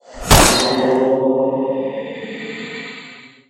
Totem Strike
An attack impact sound made for a game mod. Mixed in Audacity using following sources: